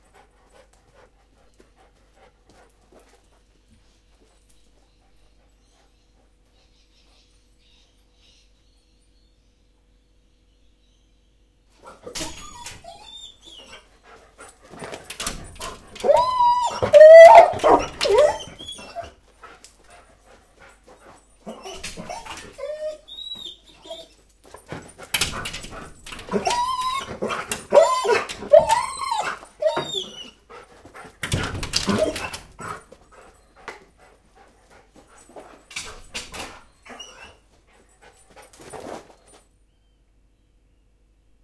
Dog whining and jumping on glass
A large doberman/labrador mix dog is in an excited state. She is trying to get out of the house to a cat outside. She is panting and whining and throwing herself against the glass sliding door. Recorded on a Zoom H4 and edited in Adobe Premiere Pro CC in Port Stephens, Australia, August 2015.
animal, bark, big, claws, cry, doberman, dog, door, excited, glass, jump, jumping, labrador, large, noisy, whimper, whine, whining, yelp